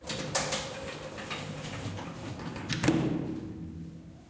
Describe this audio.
Ascenseur-Fermeture
An elevator door getting closed recorded on DAT (Tascam DAP-1) with a Rode NT4 by G de Courtivron.